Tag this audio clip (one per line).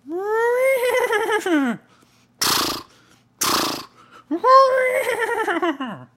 animal
horse
whiny
call